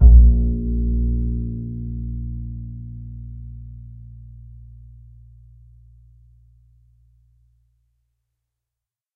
Standup Bass Normal A#1
This standup bass was sampled using a direct pickup as well as stereo overhead mics for some room ambience. Articulations include a normal pizzicato, or finger plucked note; a stopped note as performed with the finger; a stopped note performed Bartok style; and some miscellaneous sound effects: a slide by the hand down the strings, a slap on the strings, and a knock on the wooden body of the bass. Do enjoy; feedback is welcome!
Stereo, Bass, Instrument, Standup, Upright, Acoustic, Double, Plucked